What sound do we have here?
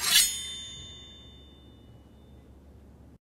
Sword Slice 18
Eighteenth recording of sword in large enclosed space slicing through body or against another metal weapon.
foley, slash, slice, sword, sword-slash